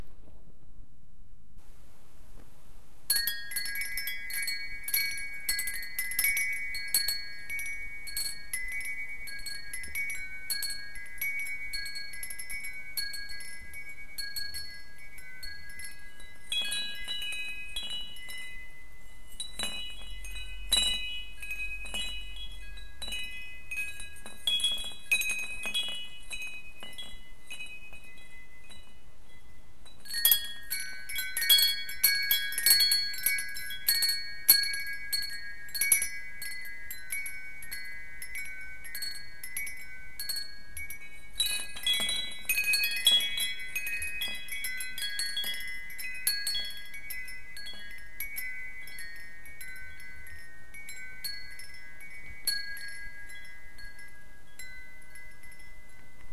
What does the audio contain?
Windchimes recording
A recording of my windchimes. Done with a bad microphone, quality isn't perfect but the sound is still usable.